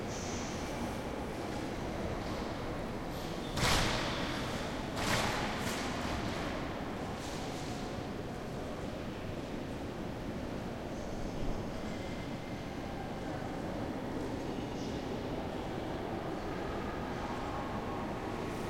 mbkl entrance near1
ambient recording of the entrance to the main foyer of the "museum der bildenden künste" (museum of art) in leipzig/germany, taken from close vicinity.voices of visitors and large doors swinging.this file is part of the sample-pack "muzeum"recording was conducted with a zoom h2 with the internal mics set to 90° dispersion.